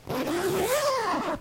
Zipping up a jacket
zipper, jacket, zipping